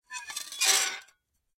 Small glass plates being scraped against each other. Rough yet pitched with lots of noise. Close miked with Rode NT-5s in X-Y configuration. Trimmed, DC removed, and normalized to -6 dB.